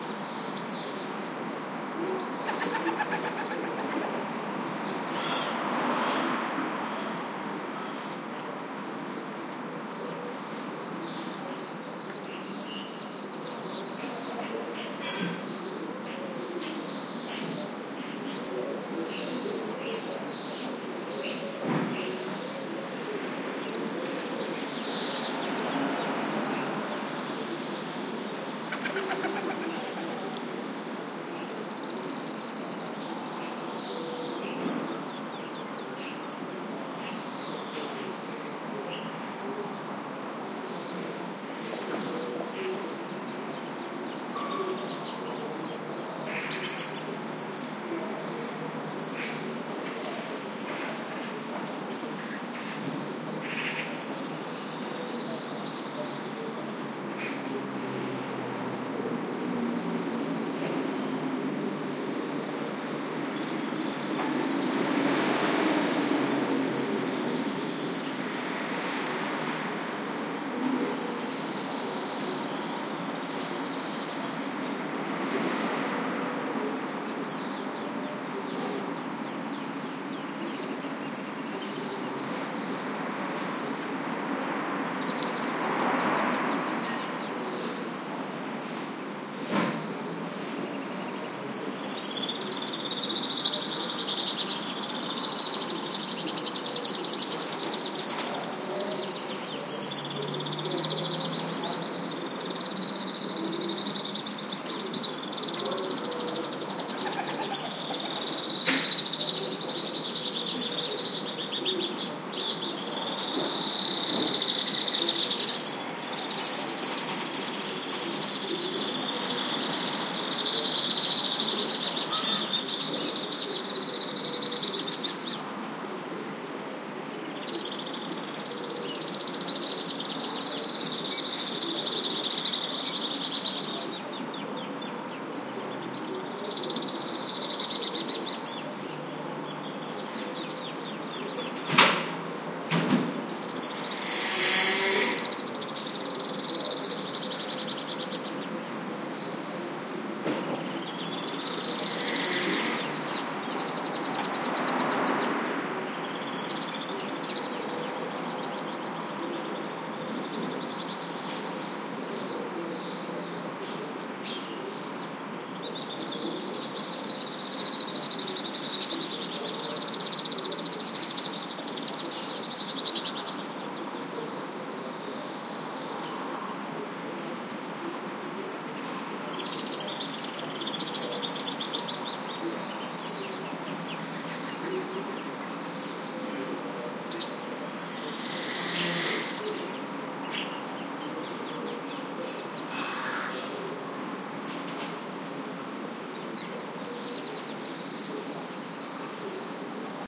20160818 saluzzo.morning.02
Morning ambiance in a medium-sized Italian city (Saluzzo, Piemonte), including dog barkings, bird callings, bangs, traffic hum, wing flutterings. Recorded in downtown Saluzzo (Piamonte, N Italy), using PCM-M10 recorder with internal mics
Alpine-swift, ambiance, birds, city, Collared-dove, field-recording